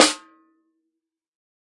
SD13x03inPearl-VHP,TSn-HdC-v10
A 1-shot sample taken of a 13-inch diameter, 3-inch deep Pearl brass piccolo snare drum, recorded with a Shure SM-58 close-mic on the batter head, an MXL 603 close-mic on the bottom (snare side) head and two Peavey electret condenser microphones in an XY pair. The drum was fitted with an Evans G Plus (hazy) head on top and a Remo hazy ambassador snare head on bottom.
Notes for samples in this pack:
Tuning:
VLP = Very Low Pitch
LP = Low Pitch
MLP = Medium-Low Pitch
MP = Medium Pitch
MHP = Medium-High Pitch
HP = High Pitch
VHP = Very High Pitch
Playing style:
CS = Cross Stick Strike (Shank of stick strikes the rim while the butt of the stick rests on the head)
HdC = Head-Center Strike
HdE = Head-Edge Strike
RS = Rimshot (Simultaneous head and rim) Strike
Rm = Rim Strike
Snare Strainer settings:
multisample, 1-shot, velocity, snare, drum